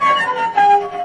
Recordings of me performing harmonics on my cello. Enjoy!
ambiance, ambient, Cello, field-recording, Harmonics, samples